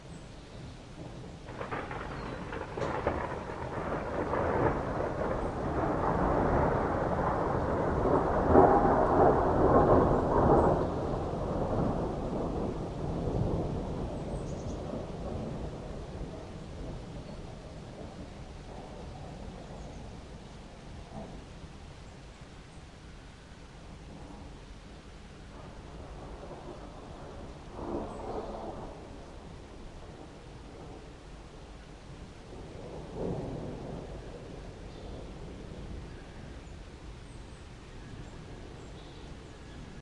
One of the thunderclaps during a thunderstorm that passed Amsterdam in the morning of the 9Th of July 2007. Recorded with an Edirol-cs15 mic. on my balcony plugged into an Edirol R09.
field-recording, nature, rain, streetnoise, thunder, thunderclap, thunderstorm